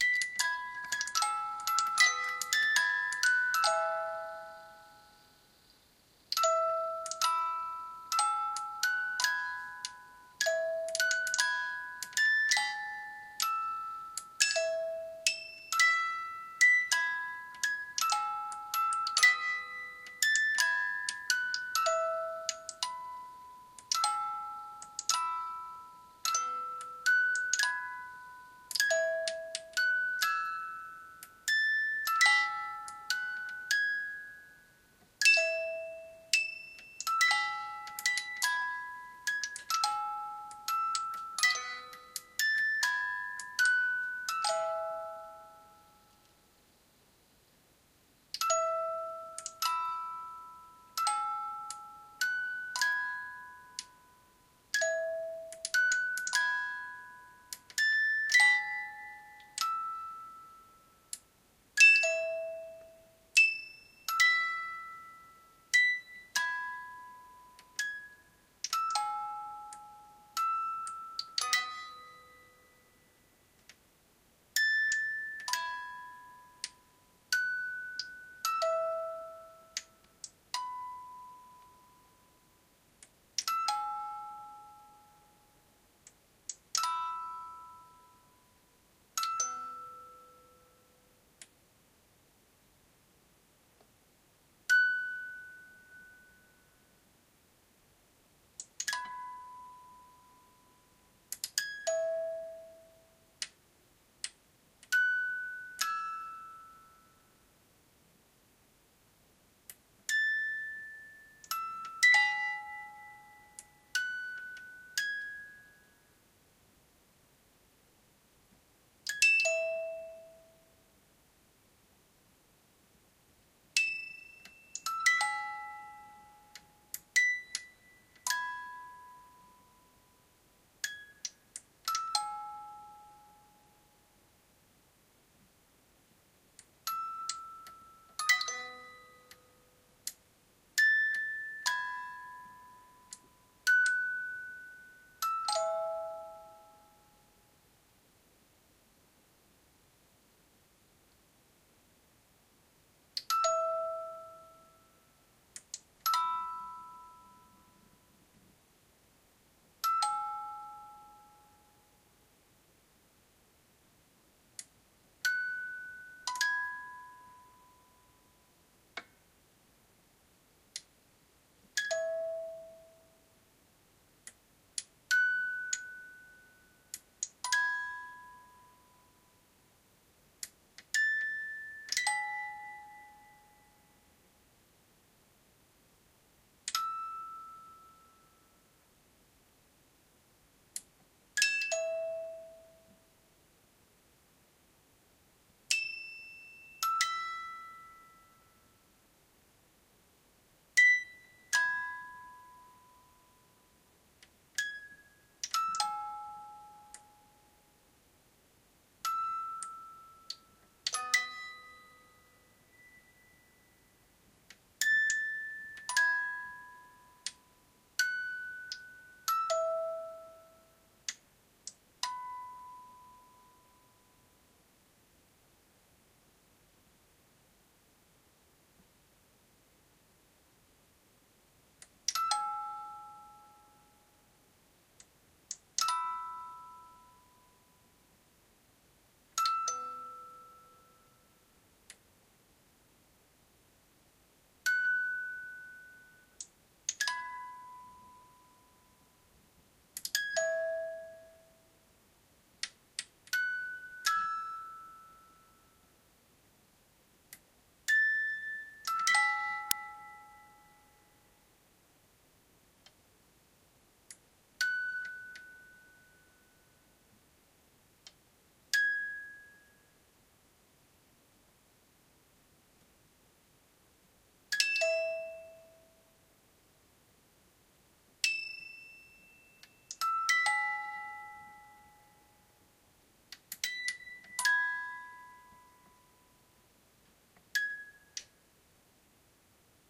The sound of a pull-cord toy playing the end of nursery rhyme, Row, Row, Row Your Boat.